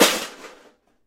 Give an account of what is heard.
aluminum cans rattled in a metal pot
aluminum, cans